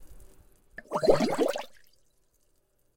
Bubbles With Bass
Short Bubbles sequence with a bit more bass
Air, Bass, Bubbles